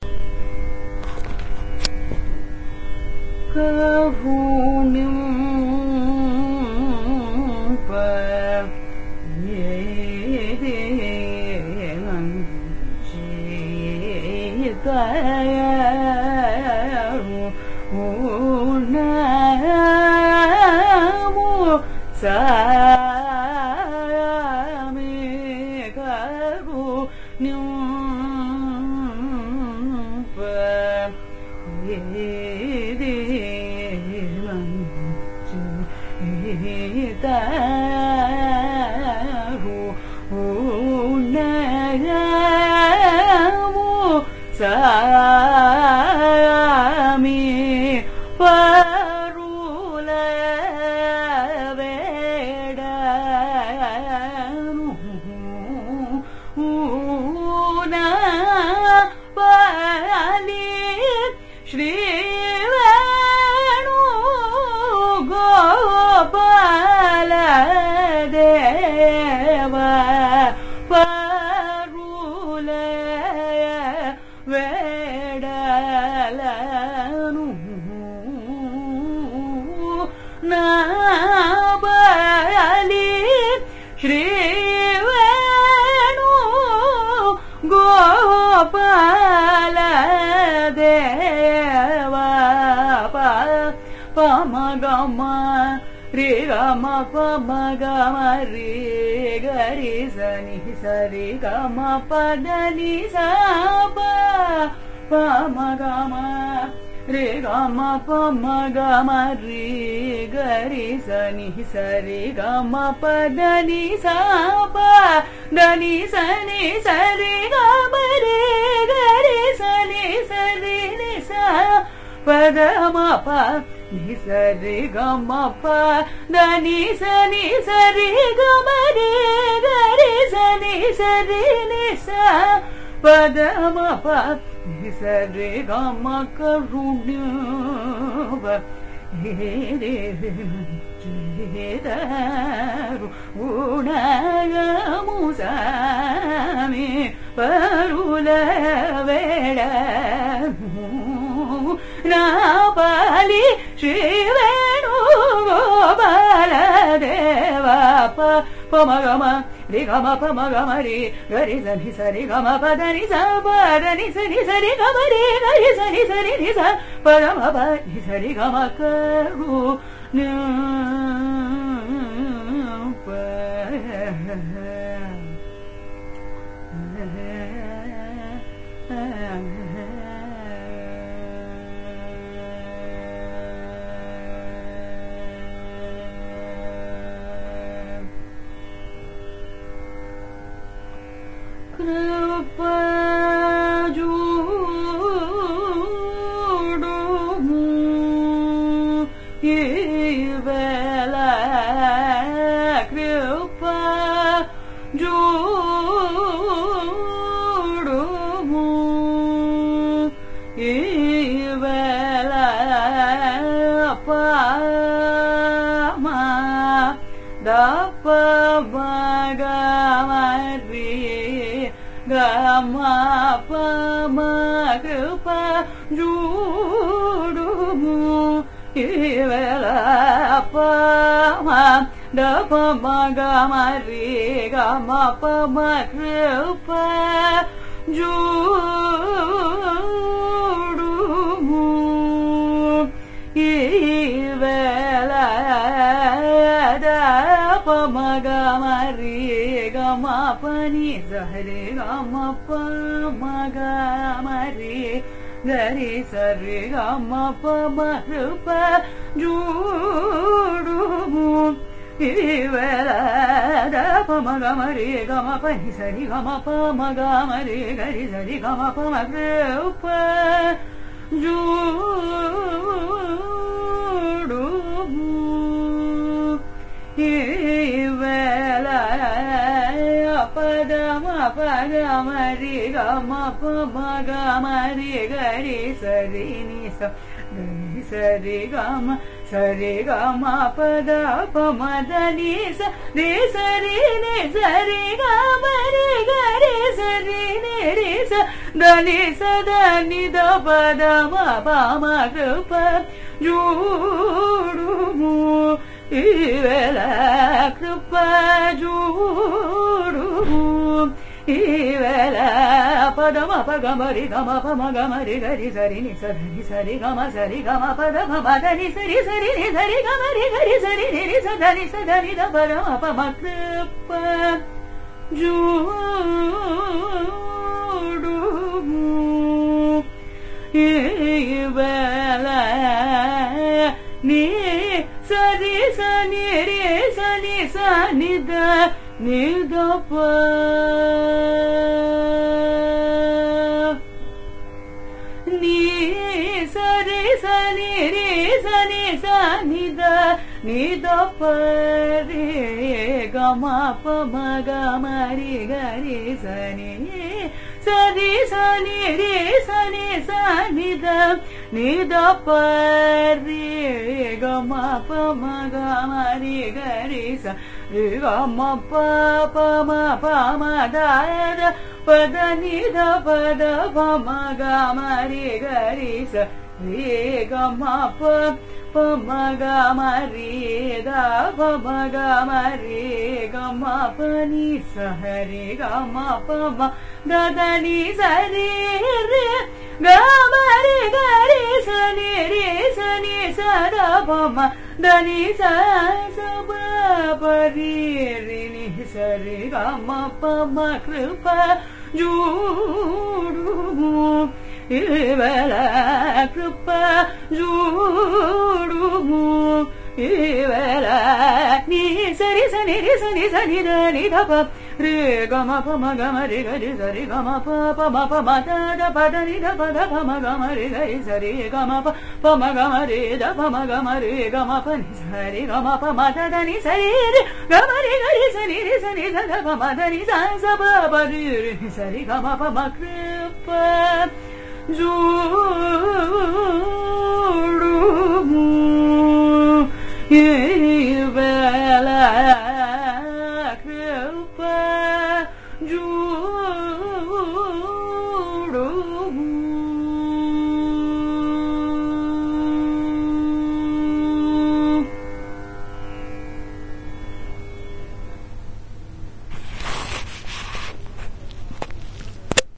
Carnatic varnam by Dharini in Sahana raaga
Varnam is a compositional form of Carnatic music, rich in melodic nuances. This is a recording of a varnam, titled Karunimpa Idi, composed by Tiruvotriyur Thiyagaiyer in Sahana raaga, set to Adi taala. It is sung by Dharini, a young Carnatic vocalist from Chennai, India.
varnam, music, iit-madras, compmusic, carnatic, carnatic-varnam-dataset